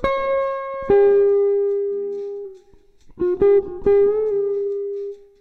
solo loops 3
nice solo guitar with a friend